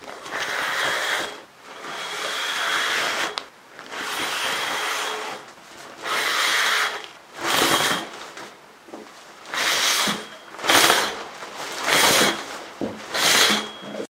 Sound of shower curtain being pulled aside at various speeds. Recorded on a Marantz PMD661 with a shotgun mic.